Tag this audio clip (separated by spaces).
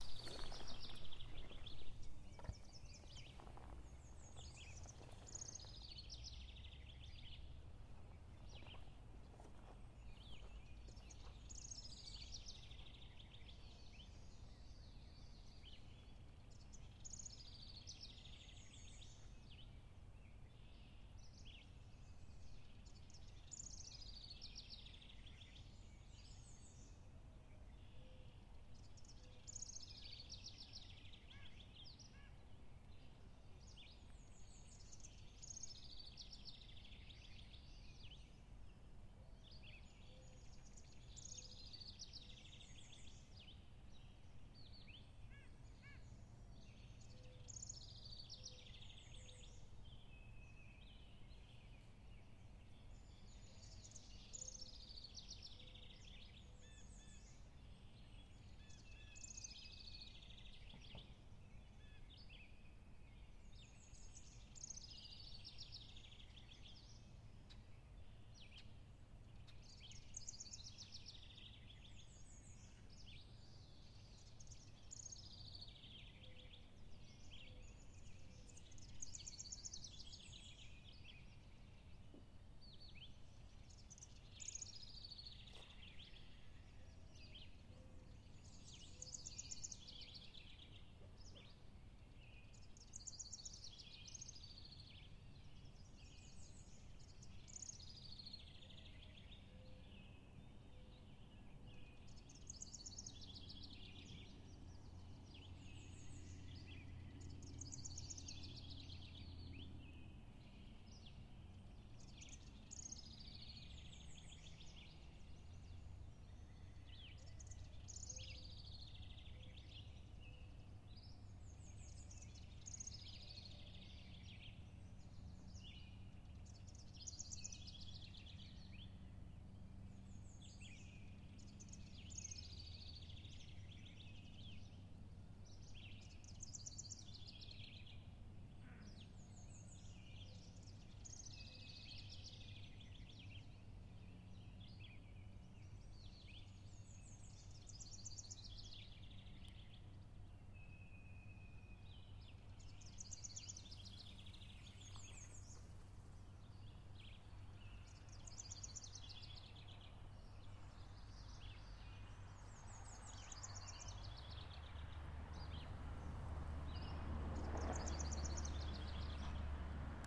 bird nature birdsong spring morning birds forest field-recording